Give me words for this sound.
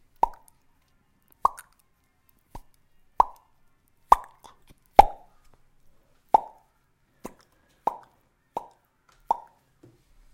pop! sound (mouth-made)
Just that sound you need when you make things suddenly appear on a video. Recorded with a RODE NT-USB